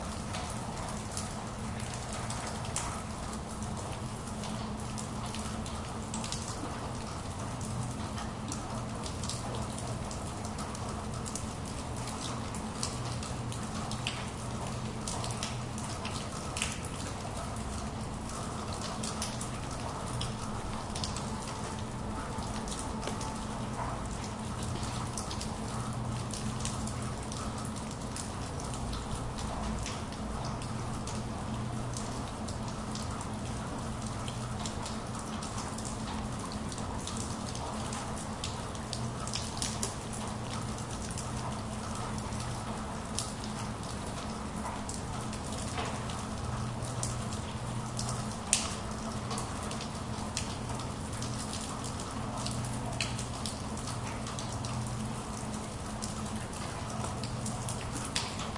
courtyard rain
water splashing between two apartment buildings during the rain.
alley, drizzle, field-recording, pour, rain, splatter, water, wet